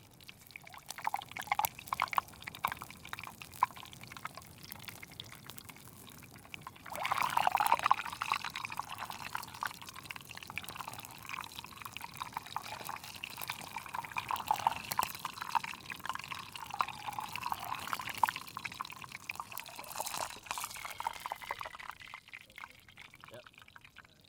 bubbling wax
A boiling liquid, it also sounds like water flowing, it reminds me of something fizzing, no wait, it does have a fizzing sound at points. Kinda sounds like a cup getting filled up for a really long time, way longer then it should ever take a cup to get filled up
This sound is part of the filmmakers archive by Dane S Casperson
A rich collection of sound FX and Music for filmmakers by a filmmaker
~Dane Casperson